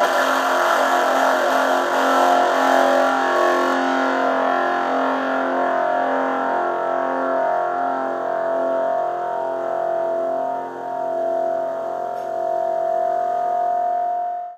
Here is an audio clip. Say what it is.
Electric guitar being routed from the output of a bass amp into a Danelectro "Honeytone" miniamp with maximum volume and distortion on both.